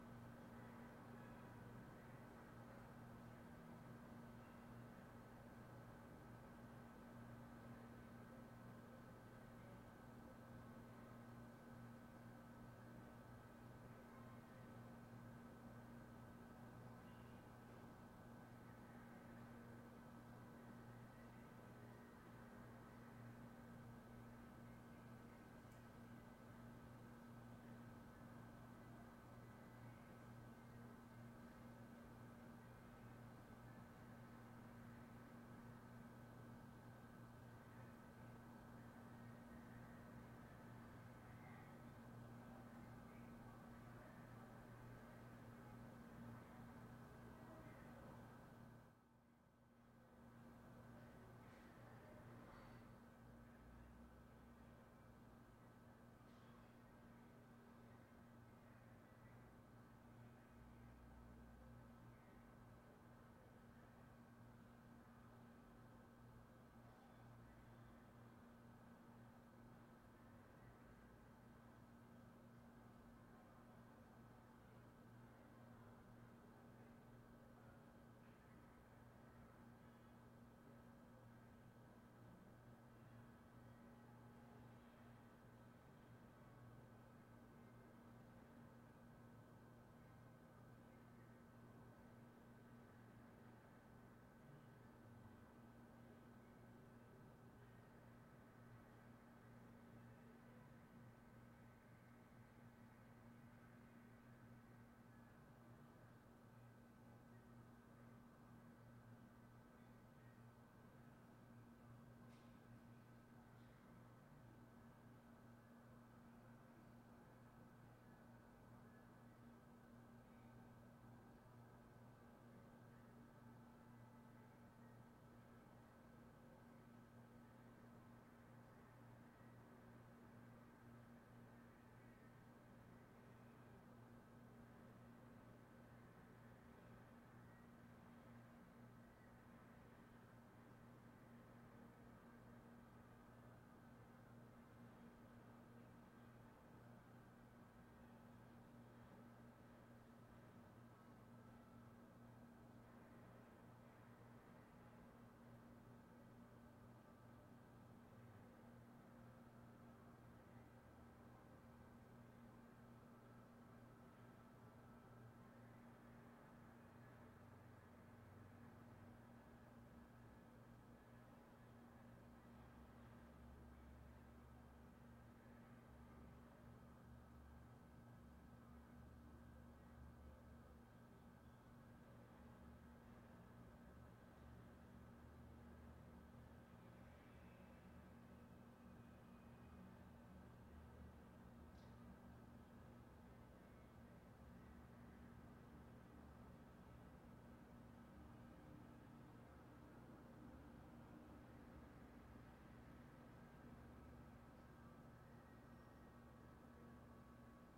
Apartment Ambiance 2
Apartment complex courtyard. Open top. Night time. Neighbors televisions and light talking in background. 3rd floor balcony.